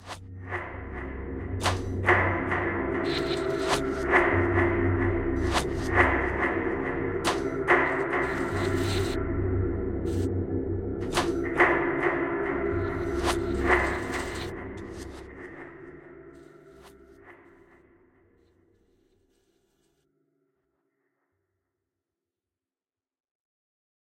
AlienXXX Remix (tools2)
Will basically be remixing/mangling Freesounders uploads no matter the genre into dark atmospheres.
Hardware - ESX1
Software - Alchemy, Eventide Blackhole (reverb), and FM8 FX.